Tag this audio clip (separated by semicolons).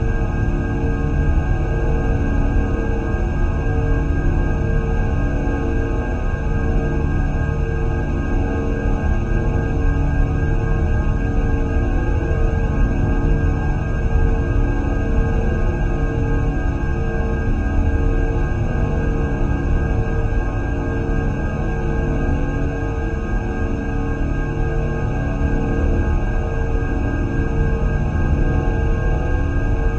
Ambience; Indoors; Room; Scifi; Tone; Turbine